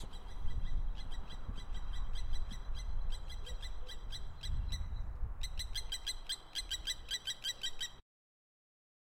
Kiwi Bird 2 SFX
Kiwi Birds in my Garden going Crazy
Bird, Kiwi, Morning, OWI, Sounds